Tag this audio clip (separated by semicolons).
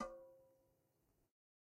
god; record; timbale; real; drum; conga; trash; garage; kit; home